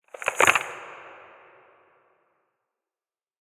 Wood Break
Made of pieces of dry tree.
crackle, crackling, broken